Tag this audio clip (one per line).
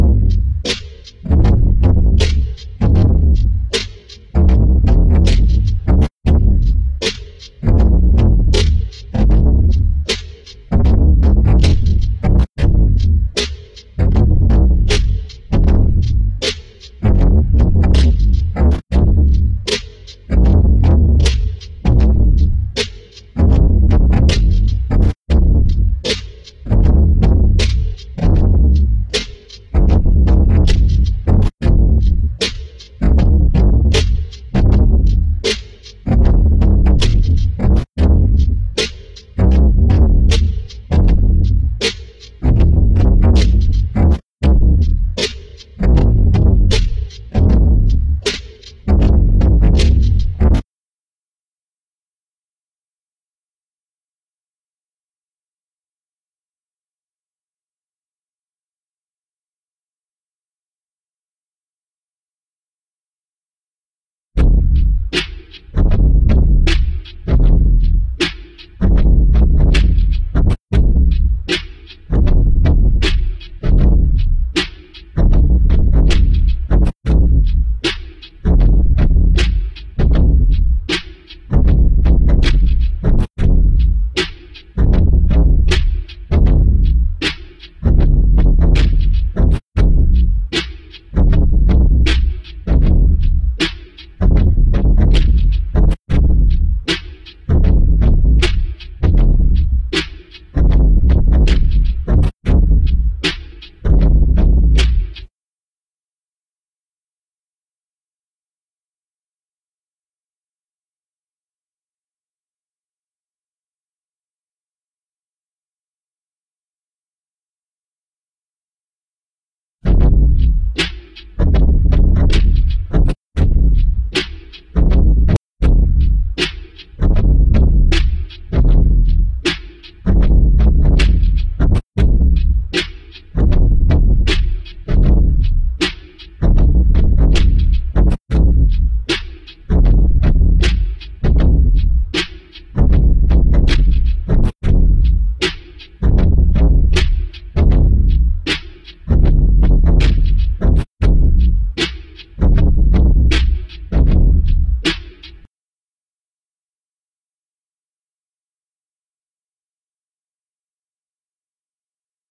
slow
drum
what
beat
music
good
groovy